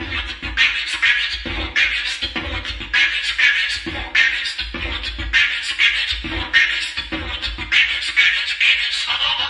Beatbox arranged with FX's. Funny. 4Bars